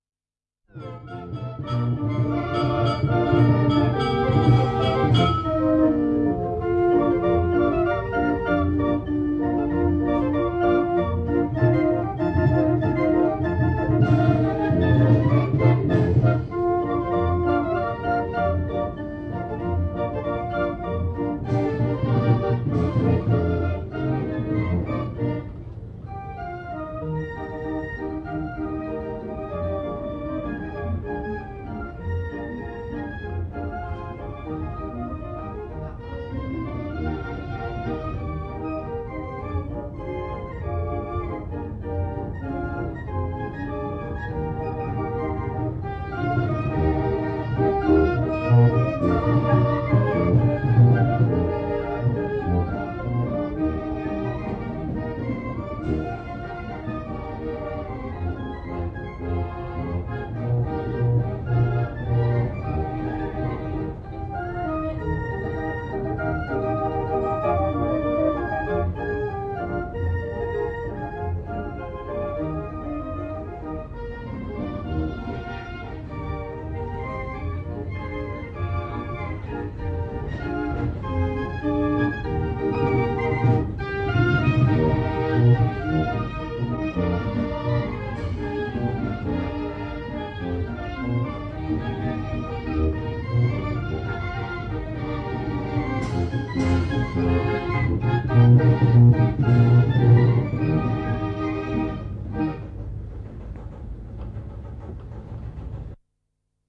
Music of Carousel // Karusellin musiikkia, Linnanmäki.
Carousel built in Germany in 1896.
Paikka/Place: Suomi / Finland / Helsinki / Linnanmäki
Aika/Date: 14.8.1959.
helsinki, yle, field-recording, huvipuisto, vuoristorata, linnanmaki, finnish-broadcasting-company, yleisradio, karuselli, amusement-park, carousel